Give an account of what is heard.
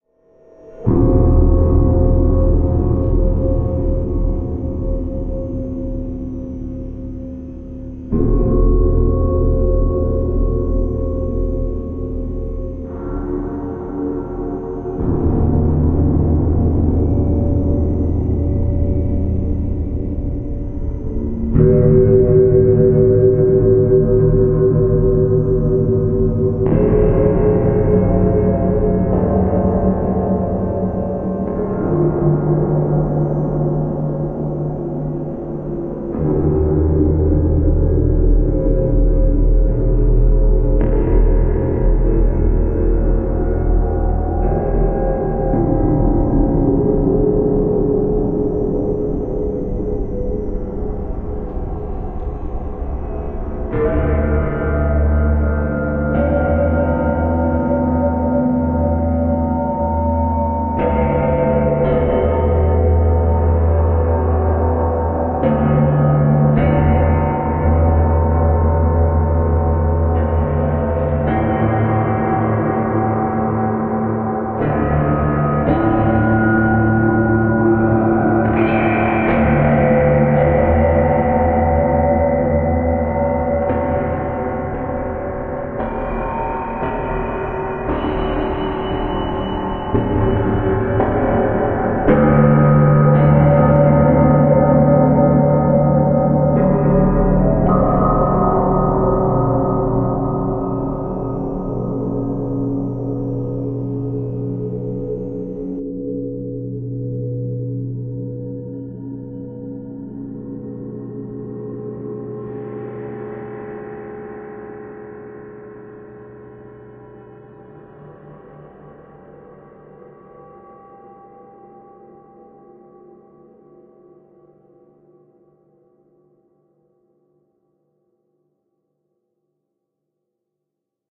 ambient sounds 006
It's been a while since I uploaded, let alone made something... enjoy the free creepiness.
Fully made with a 7-string electric guitar, a Line 6 Pod x3, lots of sampling and VST effects